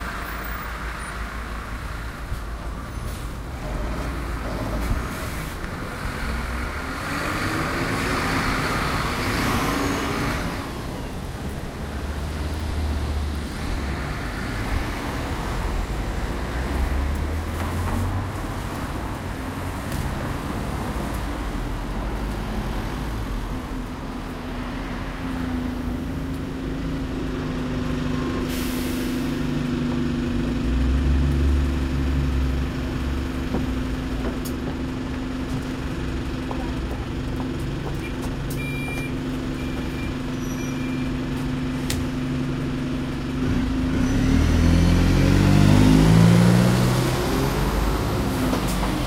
Recording of london bus road noise